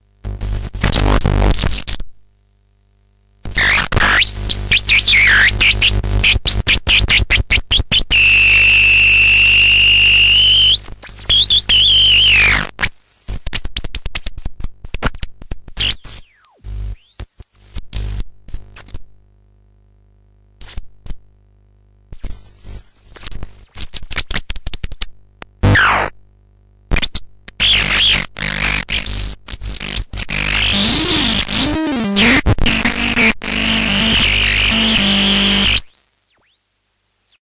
circuit-bent-stylophone
dry samples of my circuit bent stylophone
noise, glitch, sweep, circuit-bent, electronic, stylophone